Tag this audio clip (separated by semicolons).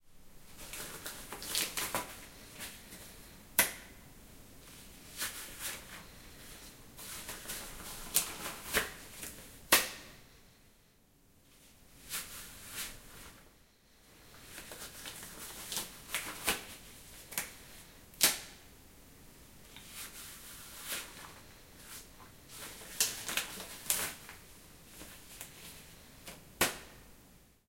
disposable; elastic; gloves; ktichen; rubber; stretching